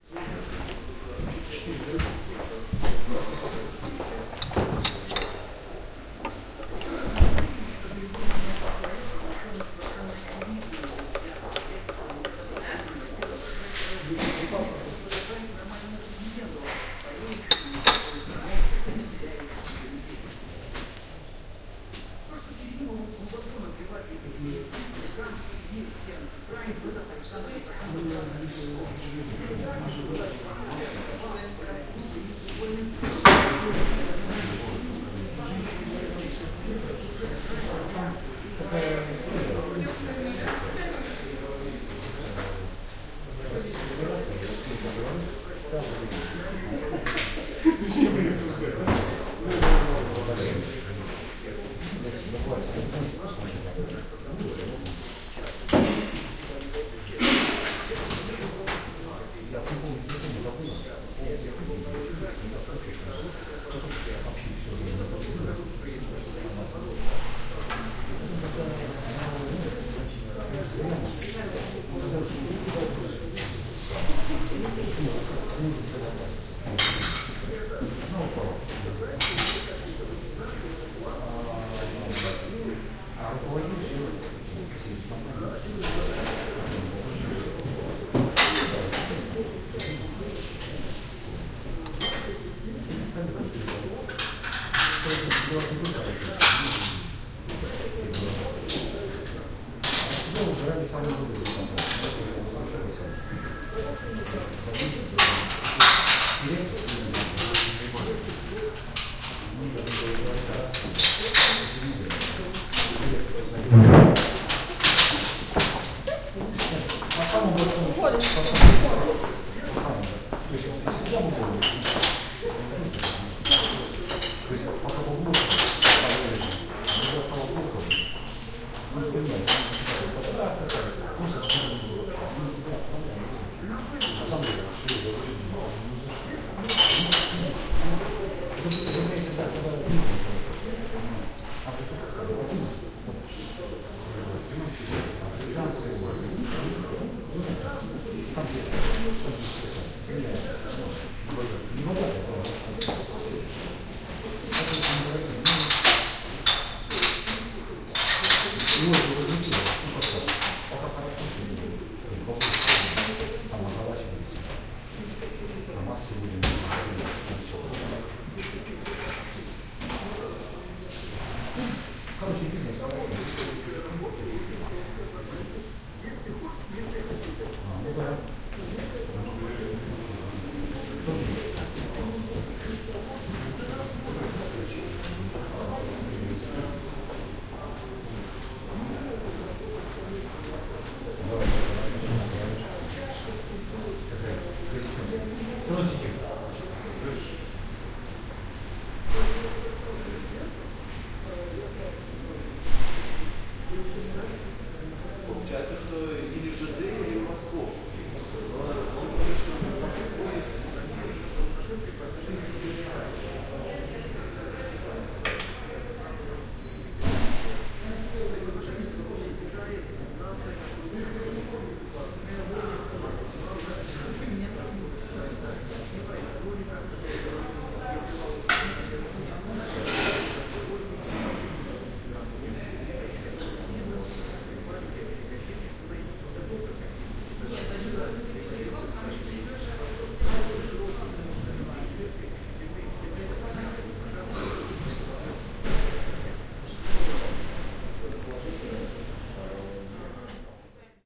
minsk hotel cafe
I am sitting in the small cafe in the lobby of hotel yubilena in minsk. waiting for my friends to come. this is a binaural-recording. some chatting, a tv set, people passing by and me drinking coffee.